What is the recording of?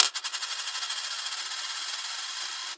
Coin on glass 2
A coin on a glass table recorded with my Shure SM7B.
coin, cash, money, coins